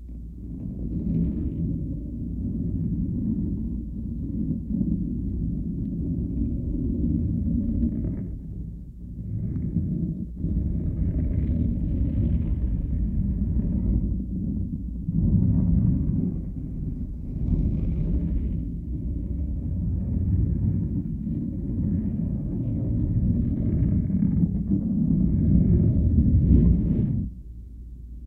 Doing some stuff underwater.
under, underwater, water